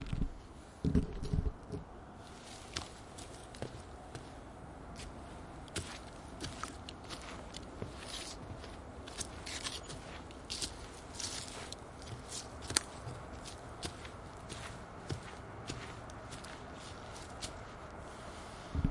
Footsteps on forest way
gravel, walking, steps, ground, footstep, footsteps